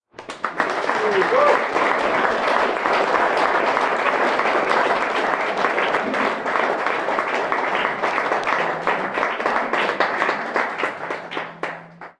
Applause CK
A stereo recording of applause after a performance in a very small venue. Zoom H2 front on-board mics.
applause, clapping, ovation, stereo, xy